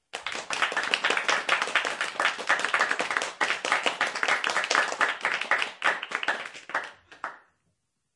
Fast Applause - 5/6 persons - 2
A small group applauding fast.
{"fr":"Applaudissements rapides - 5/6 personnes - 2","desc":"Un petit groupe applaudissant rapidement.","tags":"applaudissements rapide groupe"}
fast, clap, audience, crowd, cheer